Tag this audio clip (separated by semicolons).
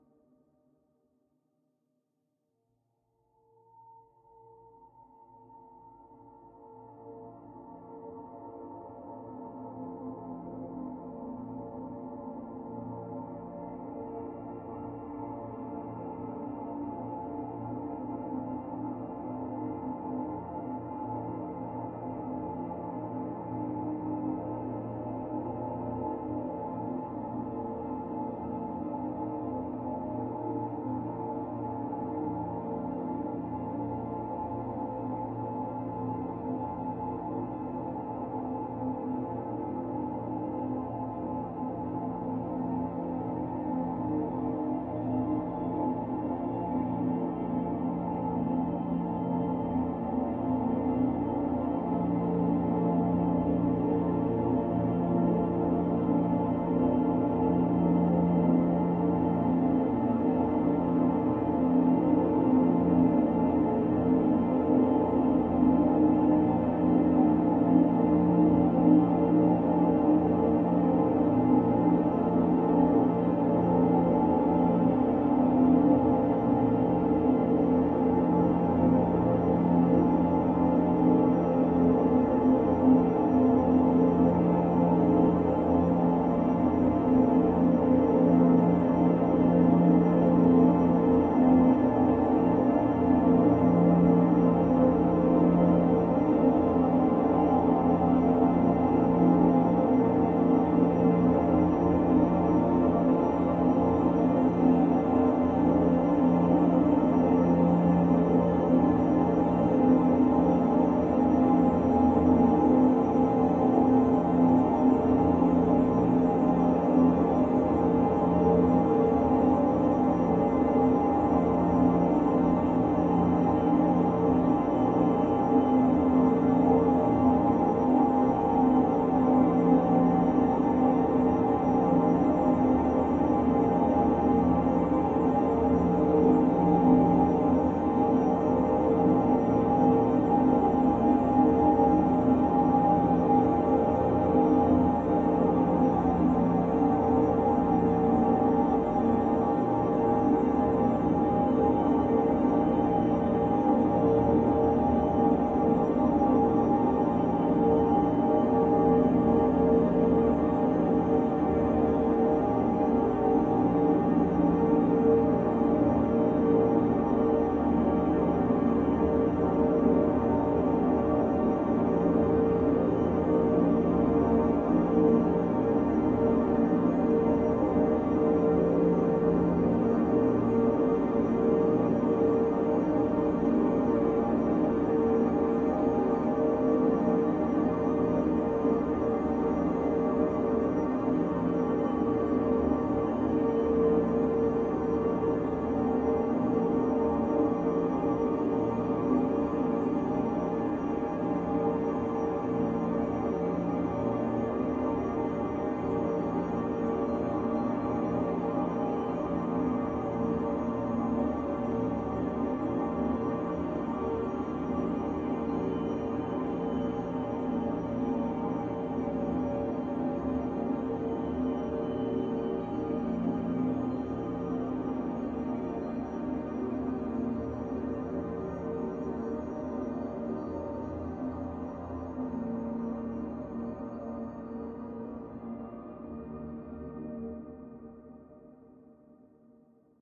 drone; divine; experimental; pad; evolving; dream; soundscape; multisample; sweet